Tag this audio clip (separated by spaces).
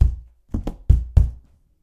boxes egoless natural stomping vol sounds 0